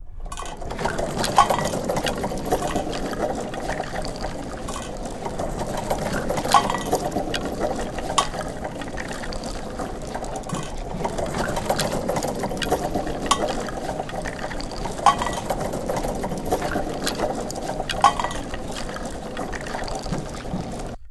Cart bieng pulled full of bones and tin cups
This is a sound I created using a wood cart, shot gun shells rattling and knives clanking to simulate bones and tin cups
cart, bones, wood-cart, foley, tin-cups